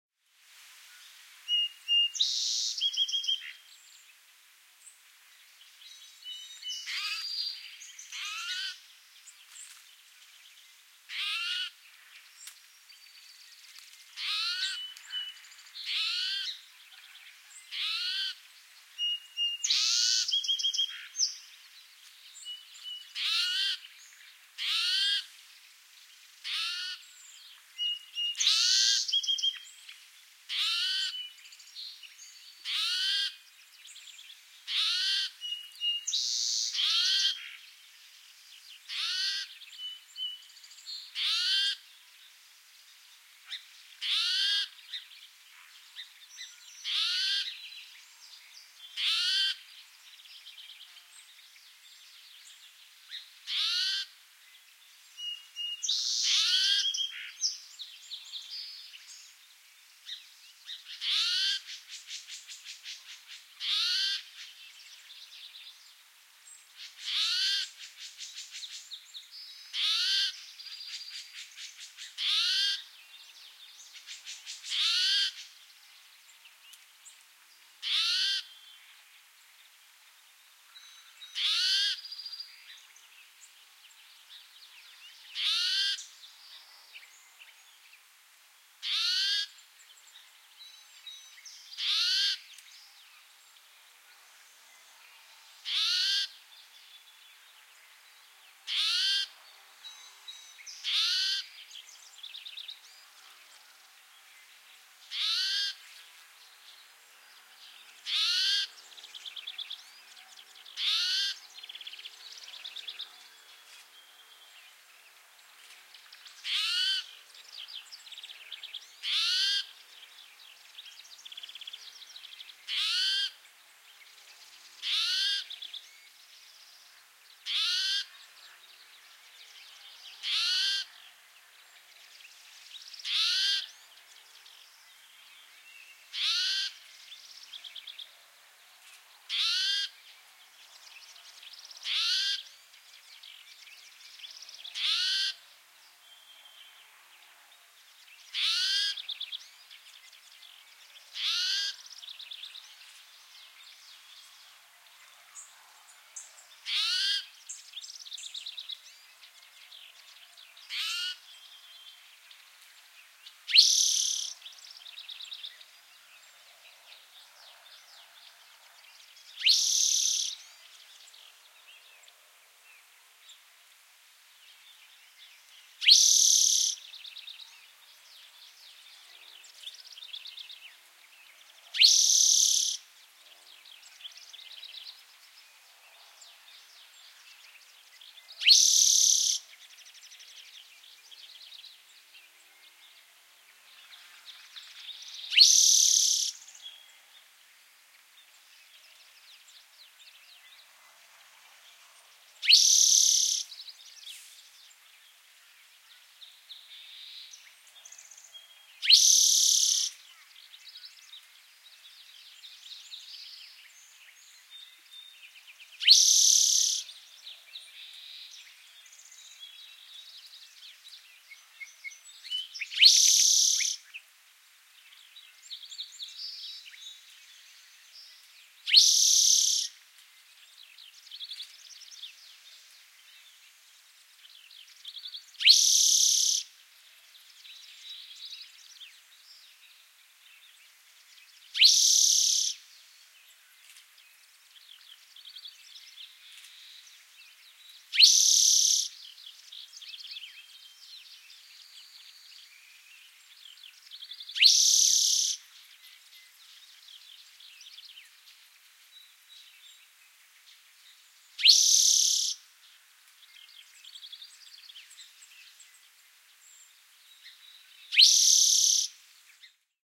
2012-04-29 spotted towhee
I went to record at a wildlife refuge today and I expected it to be quiet but unfortunately a huge train would pass every few minutes and also airplanes were constantly flying around. But in any case at one point this spotted-towhee came close to the mics and with a highpass filter it became an ok recording. He made two types of sounds, which was nice of him to share.
Recorded with a pair of AT4021 mics into a modified Marantz PMD661 and edited with Reason.